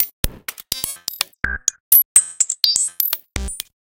MinimalBeats 125bpm04 LoopCache AbstractPercussion
Abstract Percussion Loops made from field recorded found sounds
Abstract, Loops, Percussion